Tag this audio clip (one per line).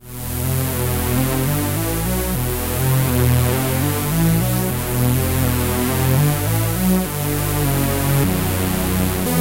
acid
bass
club
dance
dub-step
electro
electronic
house
loop
rave
saw
synth
techno
trance
wave